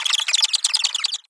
Cute Monster Wiggle
Cute weird monster hit, hurt or idle sound. Think of a big, wiggly cartoonish bug.
call, cute, dink, freedink, monster, sound, wiggle